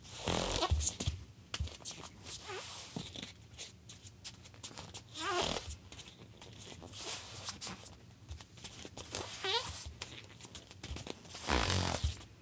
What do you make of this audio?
Footsteps, Solid Wood, Female Barefoot, Spinning